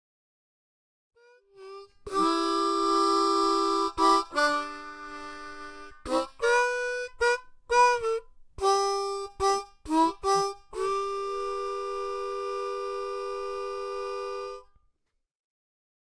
Harm. Rift 1
Harmonica tones and variations of chords.
Harmonica, music